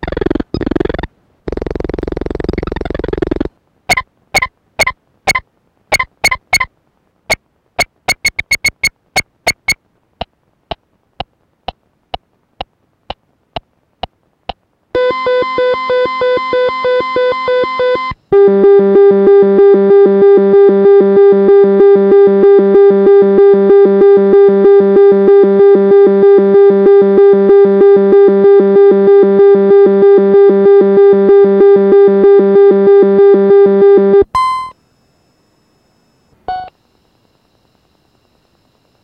sounds of braille'n speak
Braille'n speak is a notetaker for blind people with Braille input and speech output. Besides speech, it is also generating some sounds. Recording contains sounds from game called "Bongo", clicking during searching the text, beeping when user skips the line or the paragraph, stopwatch alarm, wake up alarm and some hour announcement gongs. Recorded via Line in, exported to mono, because the original output was only in one channel. The development of this product was discontinued.
wake-up, history, stopwatch, braillen-speak, noise, alarm, blind, notetaker, generated, click